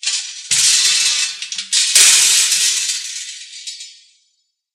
iron wicket2
another sound similar to a metal wicket
metal, wicket